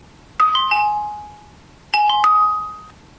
dinner chime
dinner is served!
chime, dinner